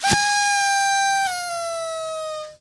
Party blower lowering in pitch at end to sound sad
effect, party, sad, party-blower, home-recording